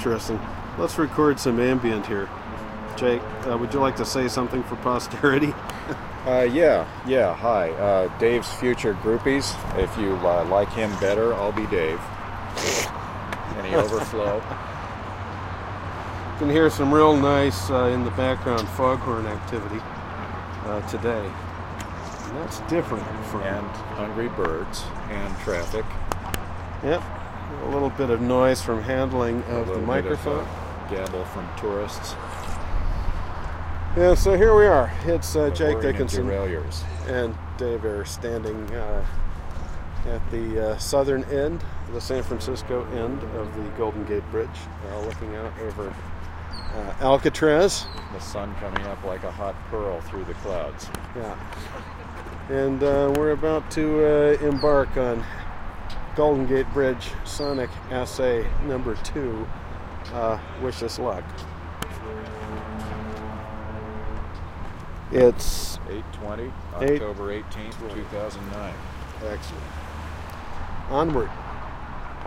GGB A0201 opening remarks
Ambient recording near the Golden Gate Bridge in San Francisco, CA, USA as recordists prepare for a contact-mic and photo session. Recorded October 18, 2009 using a Sony PCM-D50 recorder with wind sock. Good reference level for recordings in this pack: conversation, foghorns, seagulls, etc.
seagull, wikiGong, built-in-microphone, ambient, microphone, spoken-words, traffic, field-recording, bridge, banter, foghorn, Golden-Gate-Bridge, Sony-PCM-D50